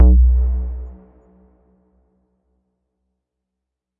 Layered and effected synth bass, long reverb tail
bass, dark, electronic, hollow, round, short, strange